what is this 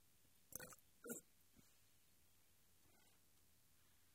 A burp. Recorded with a Tascam DR-05 and a Rode NTG2 Shotgun microphone in the fields of Derbyshire, England.